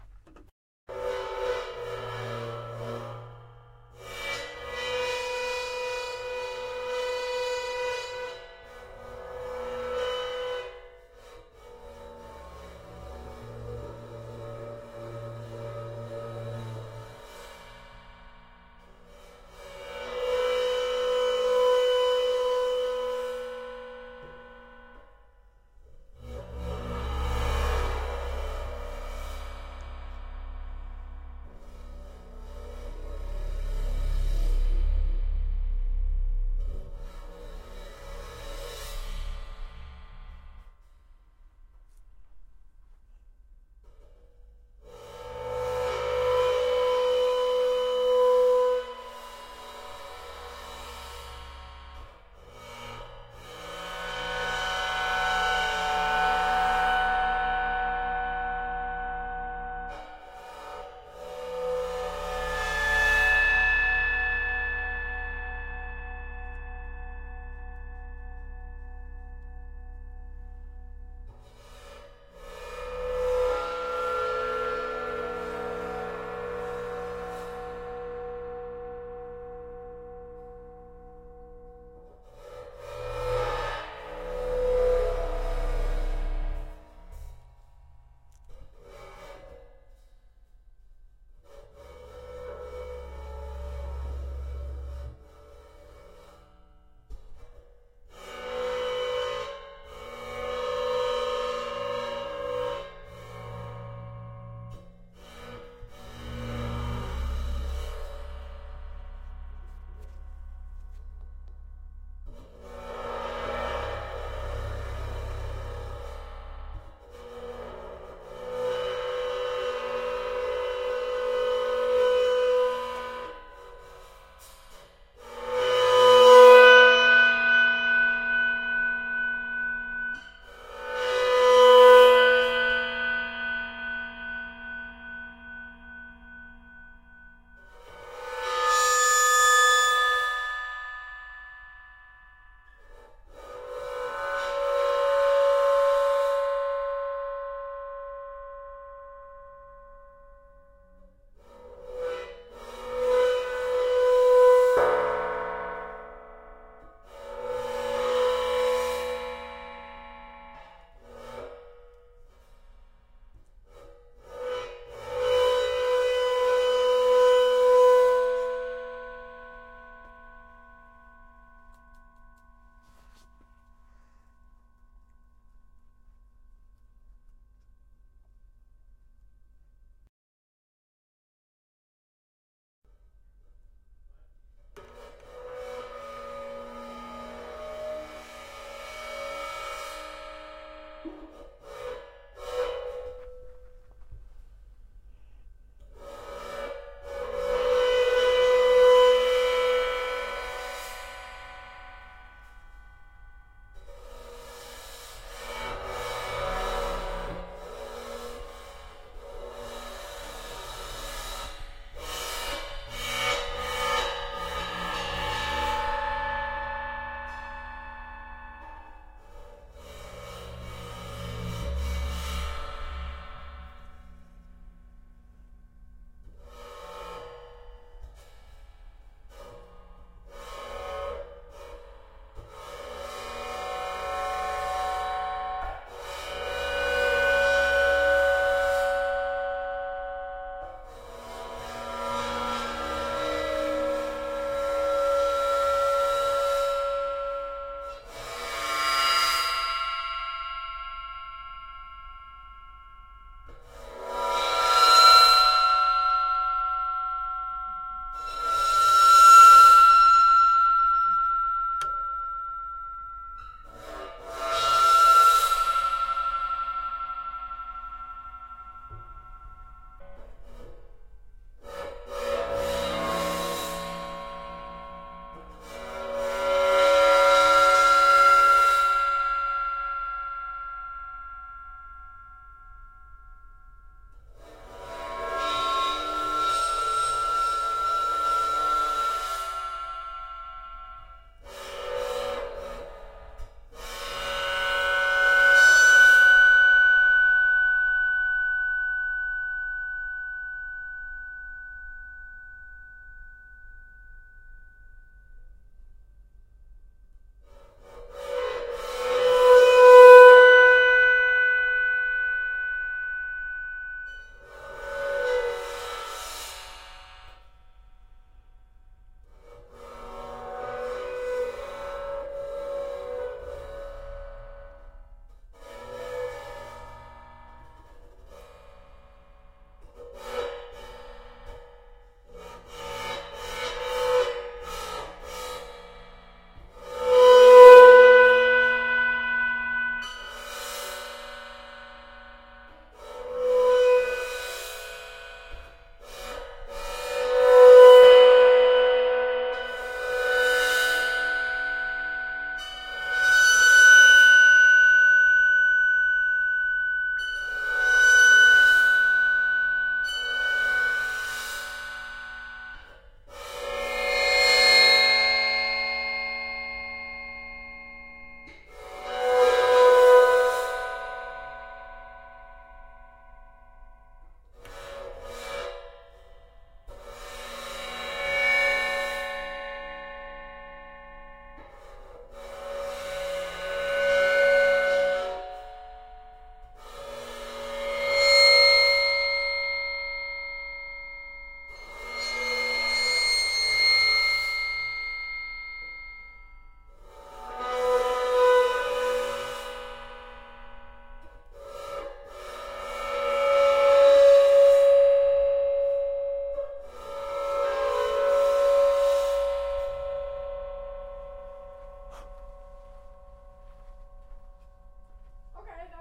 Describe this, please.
Sweep-Cymbal
Sounds of a bowed Crash-Cymbal.